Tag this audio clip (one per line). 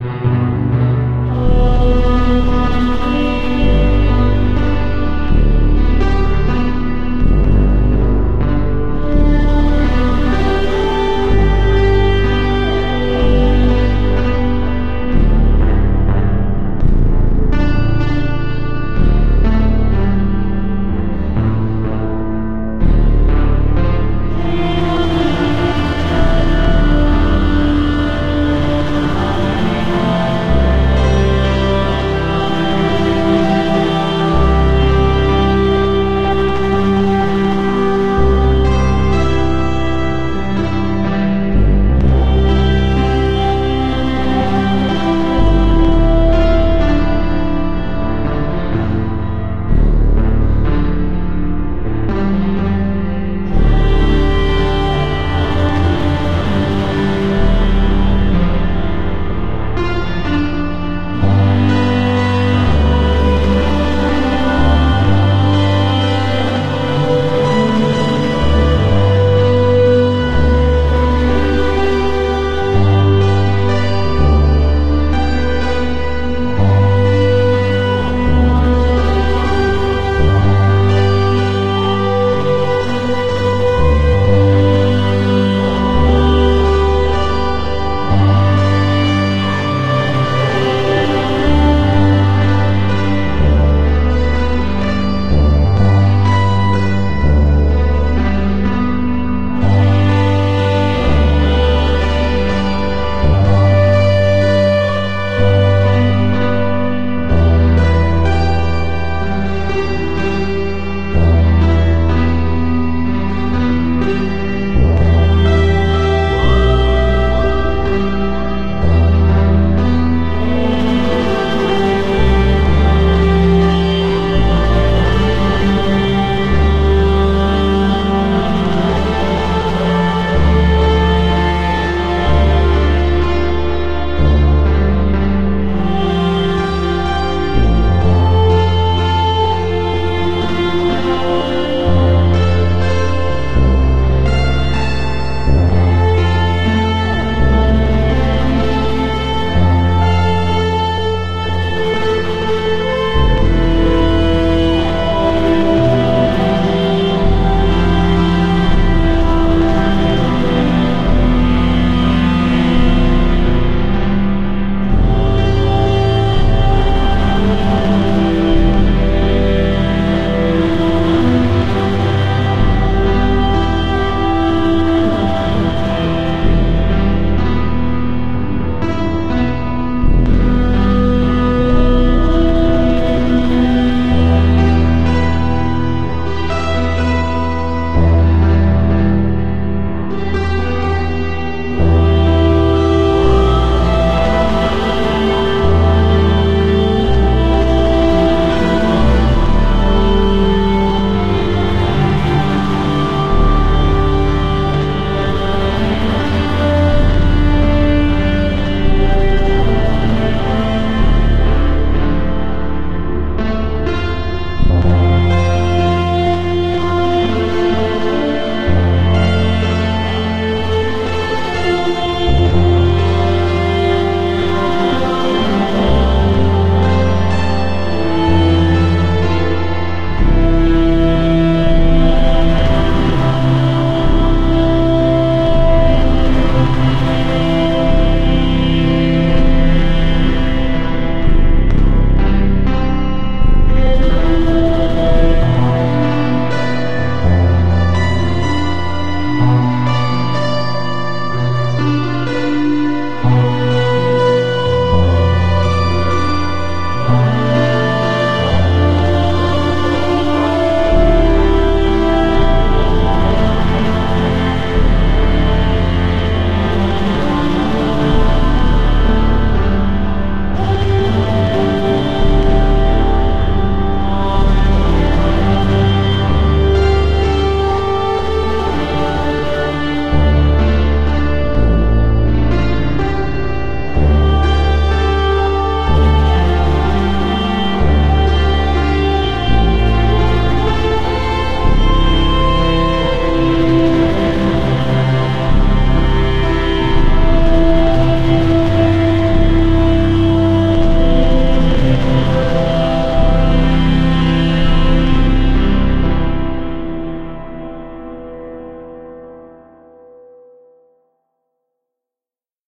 abox
choral
jambot
music
synthetic
voices